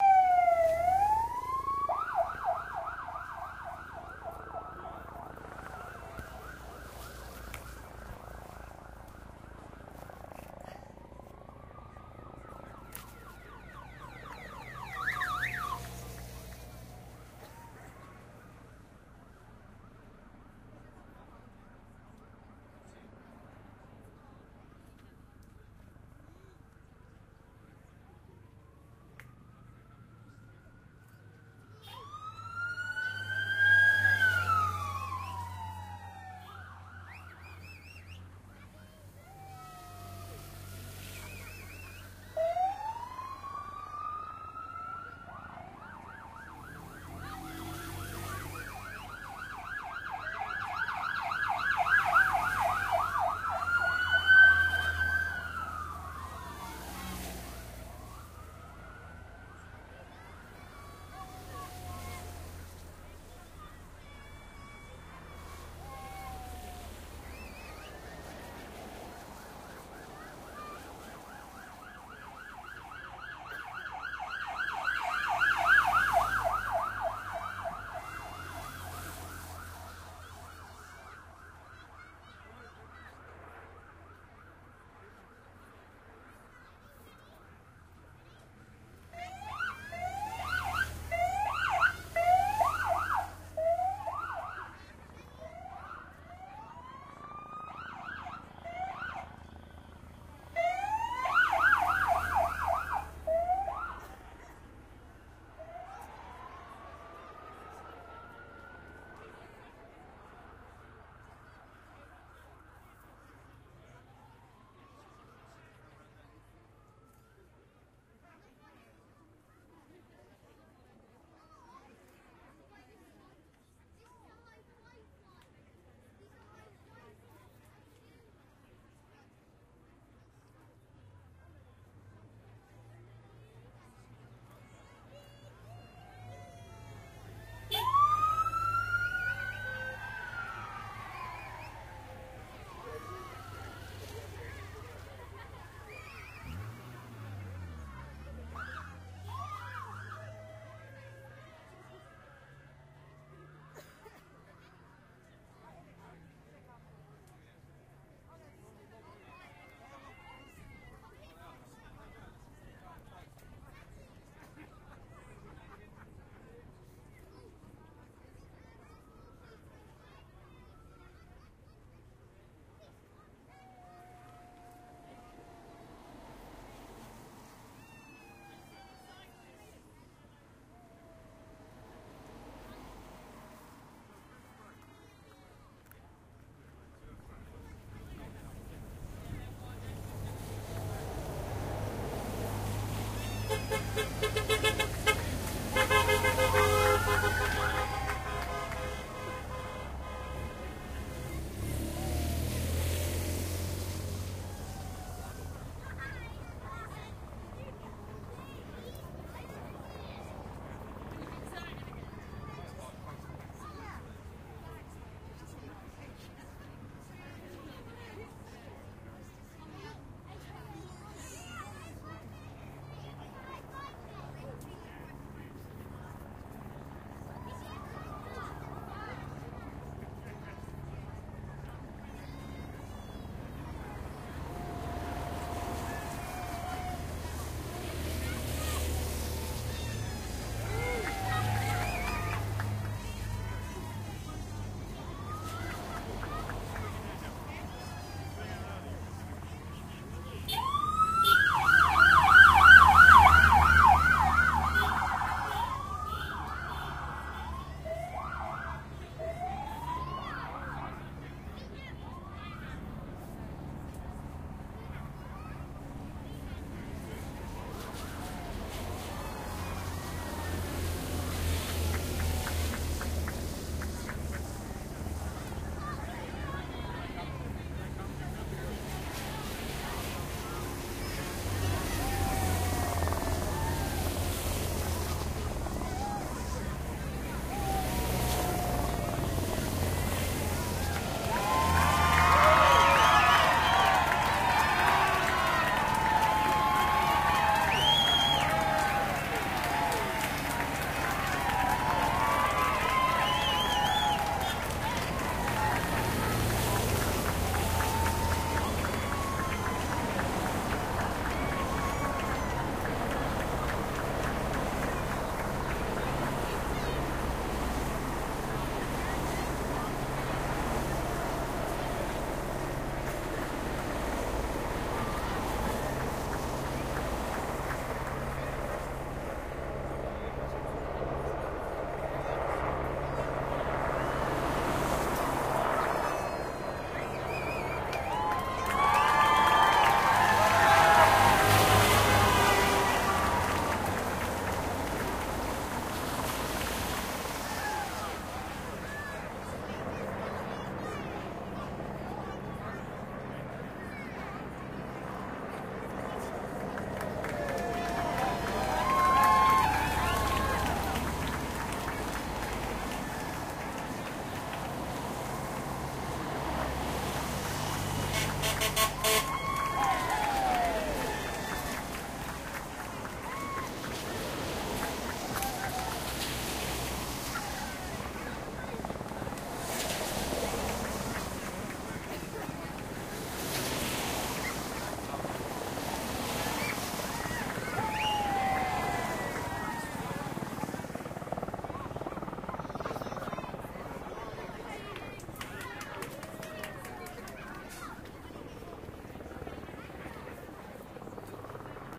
The excitement builds as the Olympics Womens Cyclists pass Newark Lane, Ripley, Surrey on 29th July 2012 preceded by Police motorcyclists getting the crowd going and Olympics vehicles.